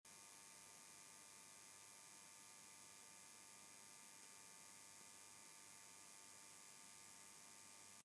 Lamp buzz noise.